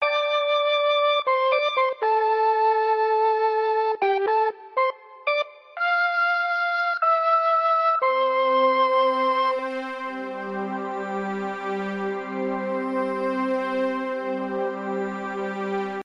house sample with mellotron